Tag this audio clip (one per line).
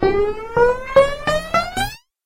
concrete
feet
footstep
footsteps
running
step
steps
walk